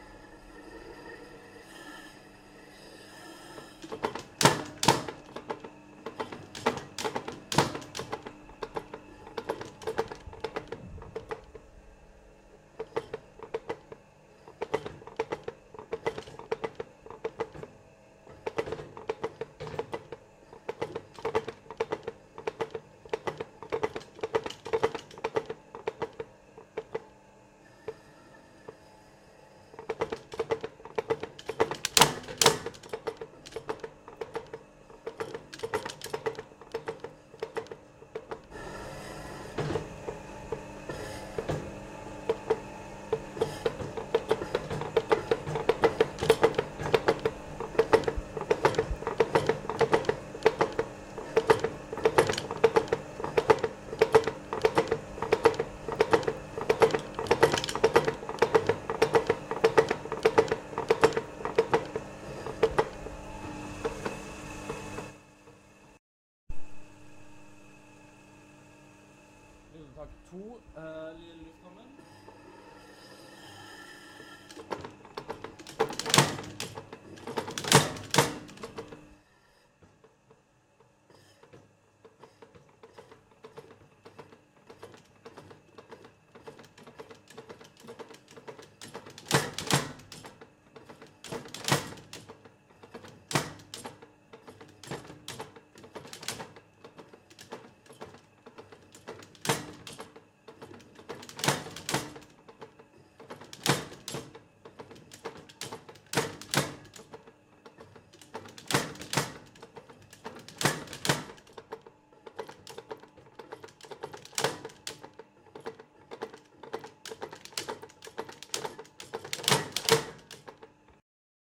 Spring hammer ntg3
Using a spring hammer in a smithy.
industrial, mechanical, hammer, spring, ntg3, R, metal, de, r26, Roland